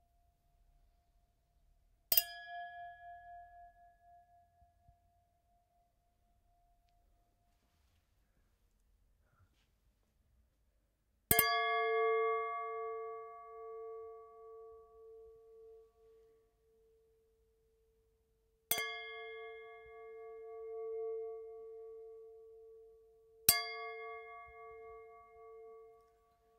cristal glass copas cristal
Cristal glass against cristal glass
bottle, clink, clinking, cristal, glass, glasses, shards, shatter, smash, tinkling, toast, wine